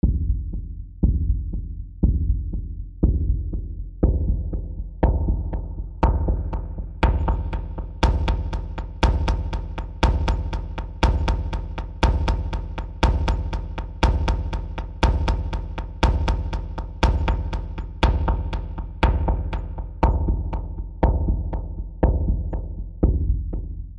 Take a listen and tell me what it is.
Electronic Moving Percussion Seq (120 BPM)
Moving Percussion Sound with Filter fade in and fade out.